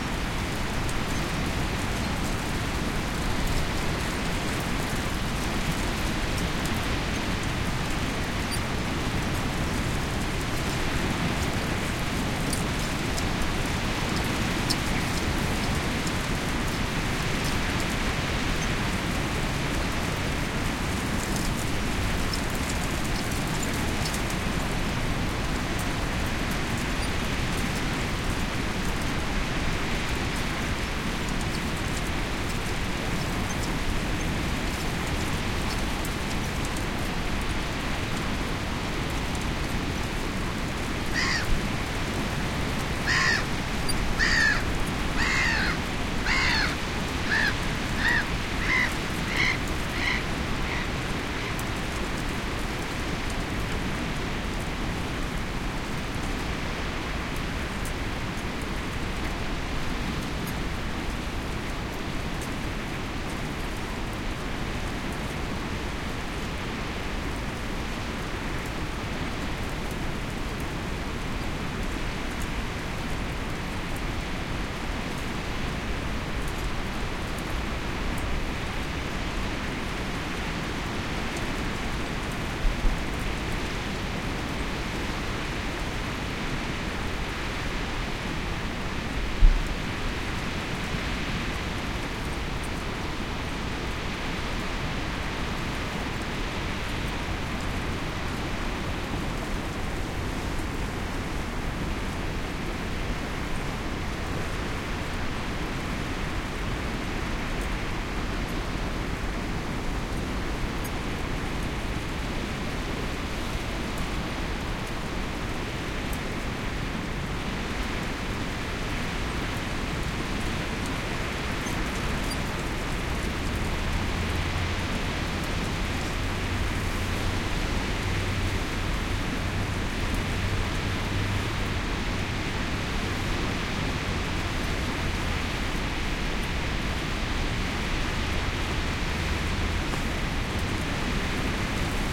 seat near the sea
Picture this: a sunny, but cold and windy day near Hjerting / Denmark in April...then there was this bench among the "Hyben" (Rosa rugosa)near the beach and to have a rest there after a long walk was just wonderfull, as it was sheltered from the wind. Sony PCM-M10 recorder with Rycote windshield.
seagull, Hyben, Denmark, Sea, Wind